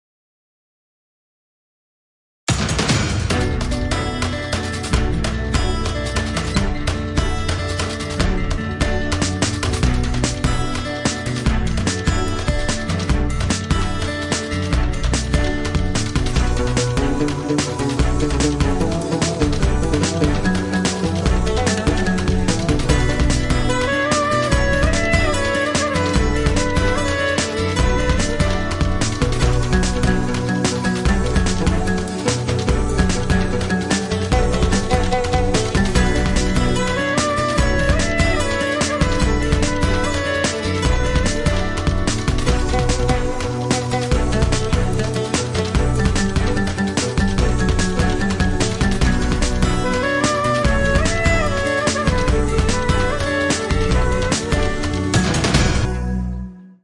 GO Z GO
heres my new upload one after many years hibernating,, serious;y i was too busy with projects, anyway this is useful for you guys for added excitement theres a clarinet solo and oud riffs too.. enjoy
builder, tension, east